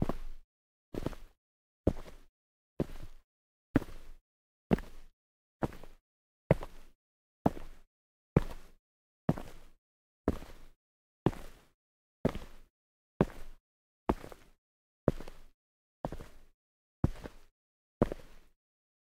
Footsteps Mountain Boots Rock Walk Sequence Mono
Footsteps Walk on Rock (x20)- Mountain Boots.
Gear : Rode NTG4+
boots, foot